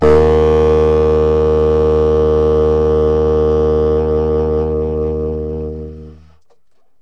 Baritone eb2 v127
The third of the series of saxophone samples. The format is ready to use in sampletank but obviously can be imported to other samplers. The collection includes multiple articulations for a realistic performance.
baritone-sax, jazz, sampled-instruments, sax, saxophone, vst, woodwind